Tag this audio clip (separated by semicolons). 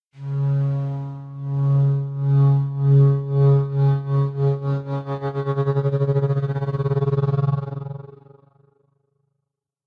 black; clarinet; fi; fiction; mirror; sci; tremolo